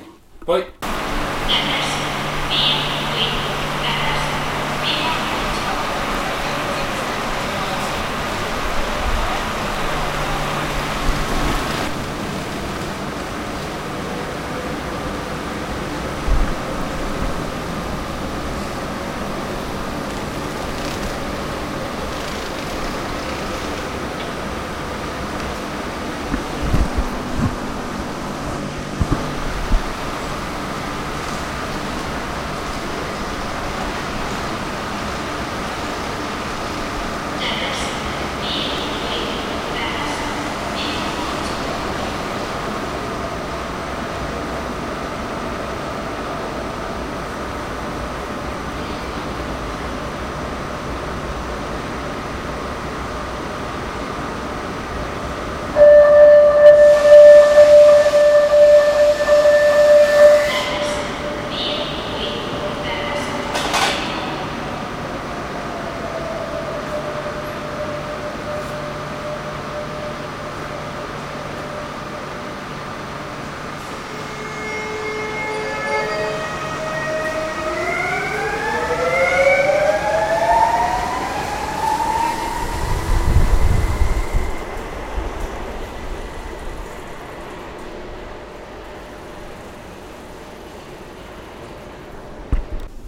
On the way home, I made a few recordings. In this one you can hear the train before mine as it leaves, it sounds pretty interesting. Mono.
catalunya-express, charging, field, futuristic, laser, leaving, minidisc, mz-r50, platform, recording, speed, train